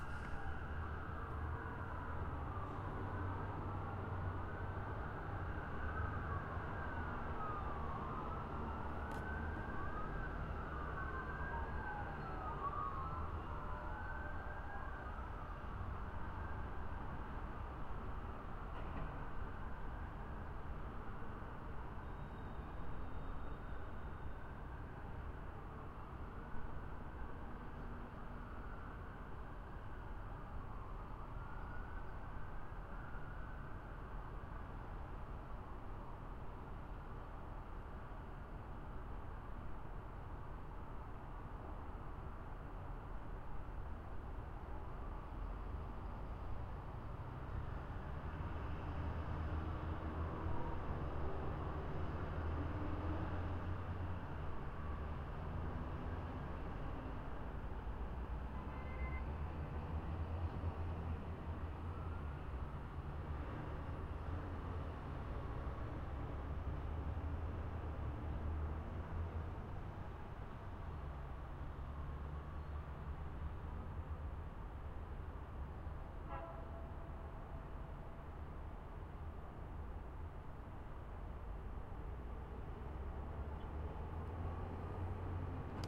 recorded sounds on downtown newark from 30 floors up off the street
building, cars, fire, morning, newark, police, scraper, sirens, sky, top, traffic, truck, wind